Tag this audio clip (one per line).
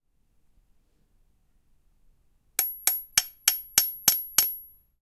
blacksmith
hammer
iron
nails
Riveting
steel